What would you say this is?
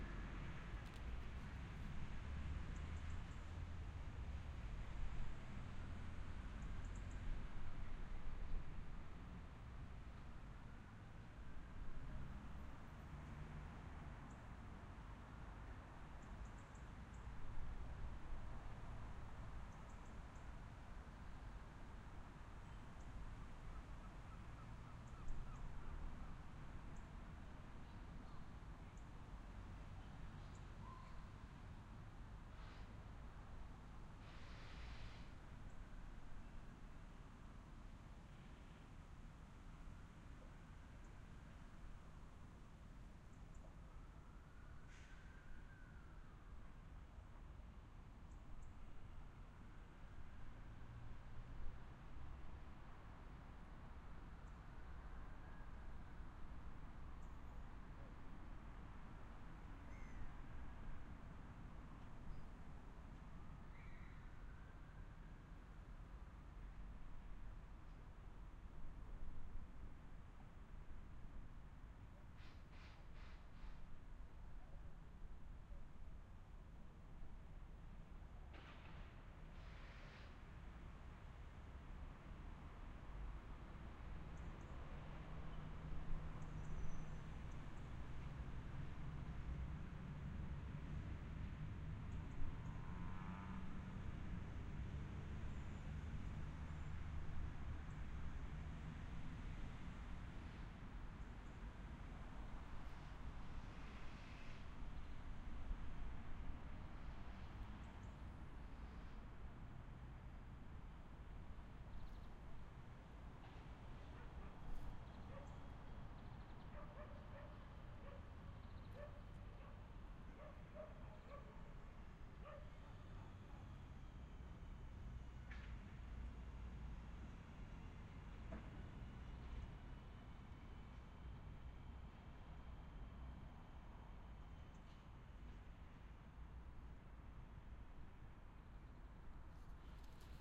An empty city park. Ambient traffic noise in the background. Recorded on a Audio Technica BP4025 stereo microphone and Zoom F8 Mixer